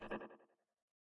ping pong ball passage
ball, passage, ping, pong